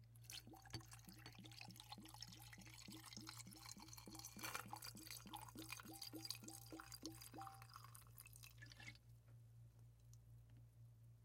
Continuous pour of liquid into glass container, liquid and ice hitting sides of container, glugs of liquid pouring out of bottle